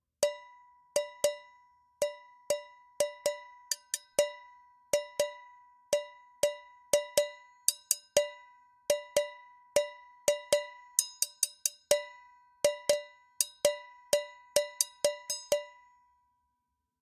Collective set of recorded hits and a few loops of stuff being hit around; all items from a kitchen.
Domestic, Fork, FX, Hit, Hits, Kitchen, Knife, Loop, Metal, Metallic, Pan, Percussion, Saucepan, Spoon, Wood